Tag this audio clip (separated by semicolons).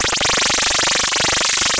abstract; digital; effect; electric; fx; glitch; lo-fi; loop; noise; sound; sound-design; sounddesign; soundeffect; strange